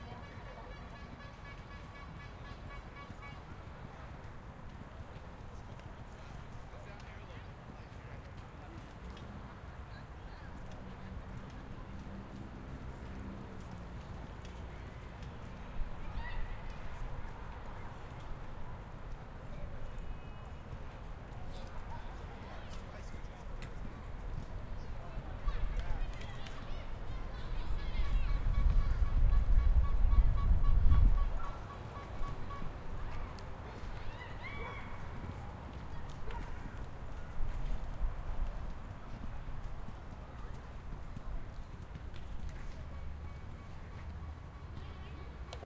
Day Park Ambience
Field recording of a park during the day.
field-recording, park, ambience, day